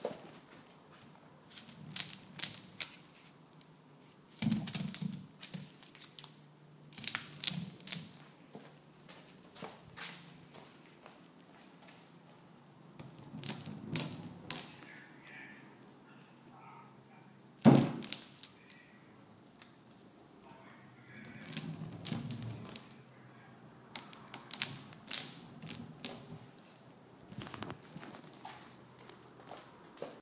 onion rolling on counter and on wood table
food, onion, roll